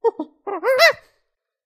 Needed some cheeky monkey noise for an infographic I made and just randomly mumbled into a mic. (Genesis Radium 400)